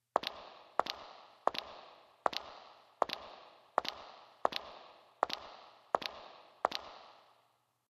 foot steps sound made from my yamaha psr
foot, foot-steps, footsteps, steps